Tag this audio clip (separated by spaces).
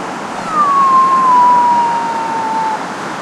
beach mixing-humans mixinghumans sea sound-painting yell yelling